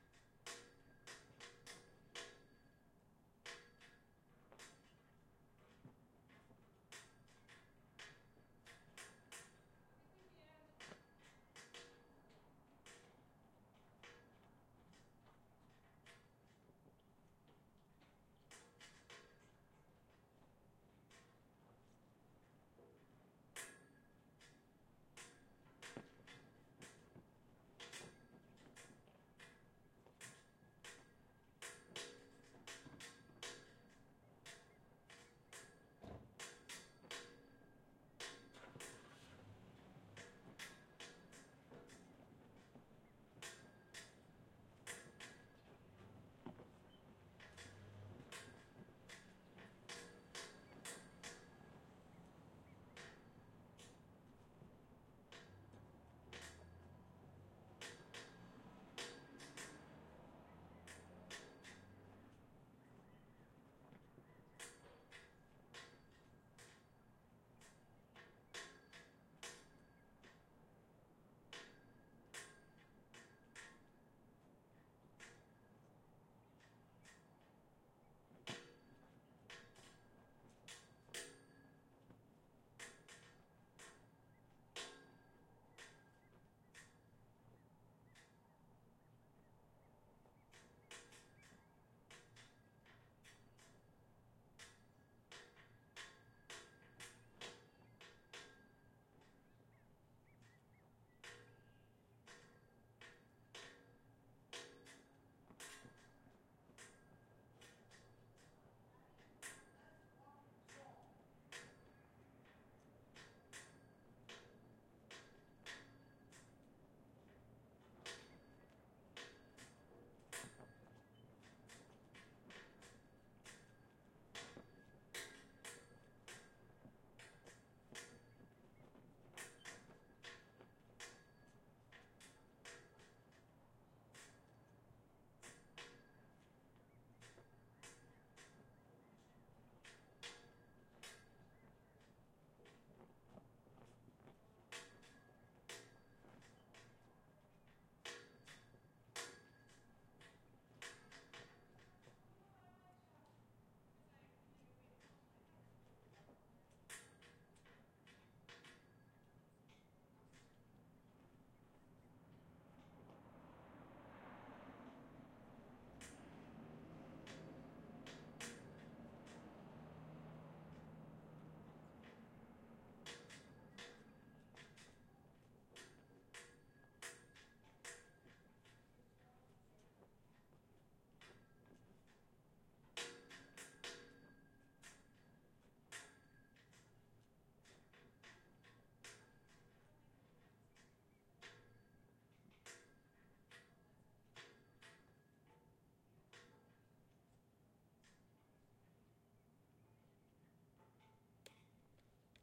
AMB School Ext Flagpole 001
Nice sounds of the flag and the rope slapping against the pole in a gentle breeze.
Recorded with: Fostex FR2Le, BP4025